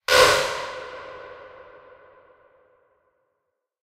An accent sound for when attention-requiring events happen to make the player react in Super Sun Showdown. Recorded slapping a magazine on my legs in an echoing room with Zoom H2. Edited with Audacity.